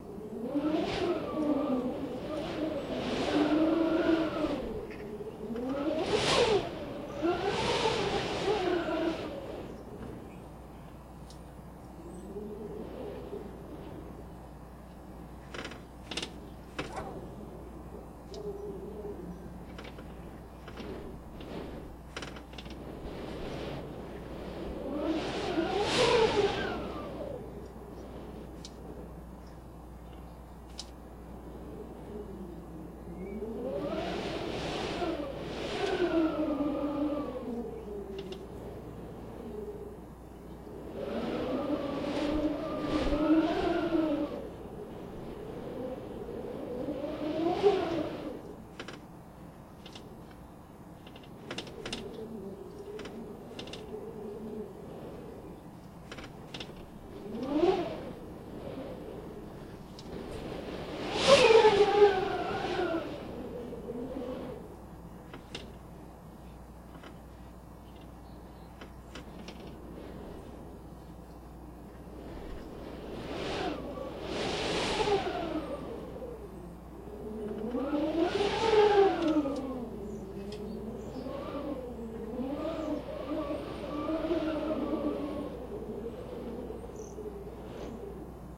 Engine 11 Wind Cracked Window Loop 031006
Just put a mic near the window. Left and Right are seperate sections of a 13 min recording. Cleaned/Edited with Cool Edit Pro 2.